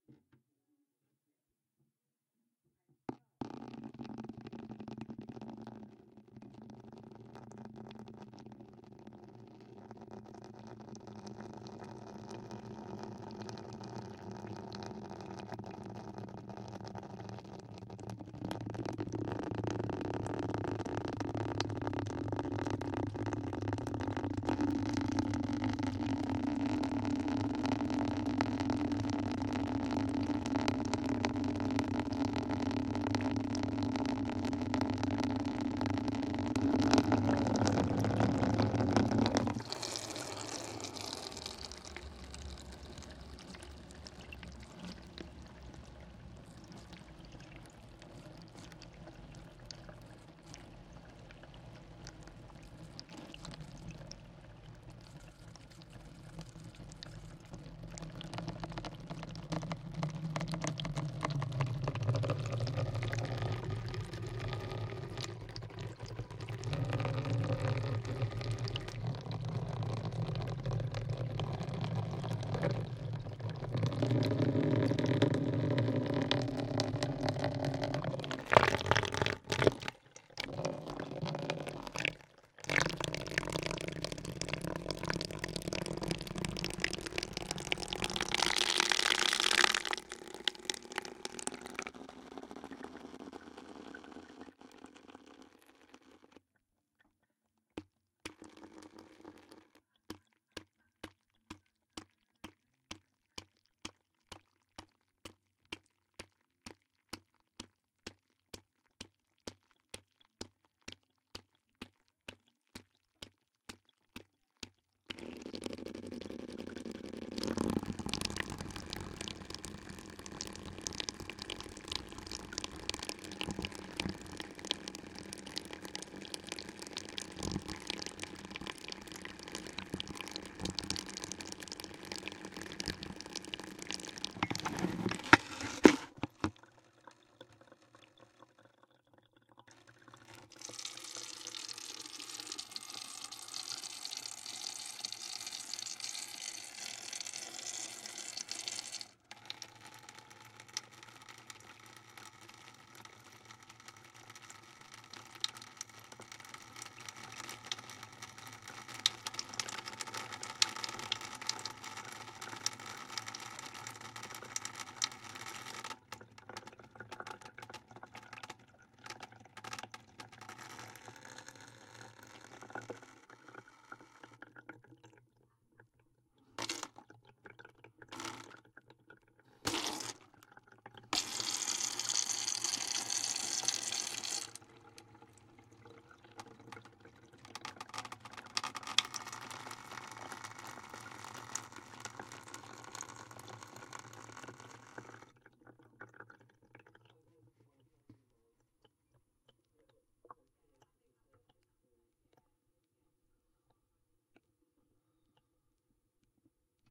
A recording of a water tap using a home made pizzo hidrophone.
water, tap, faucet, dripping, hidrophone